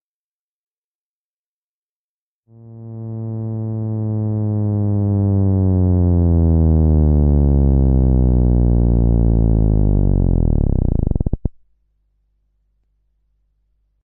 Moog Theremin Sweep 2
Moog Theremin recorded sweep.
Effect, Riser, Sweep